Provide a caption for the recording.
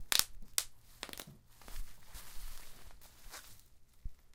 The typical sound of squeezing bubblewrap to pop the bubbles.
My 5 year old daughter Joana helped! Kids love to pop bubblewrap!
dare-9, plastic-wrap